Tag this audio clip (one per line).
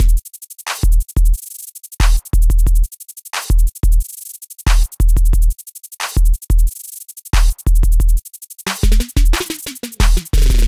awesome
Hiphop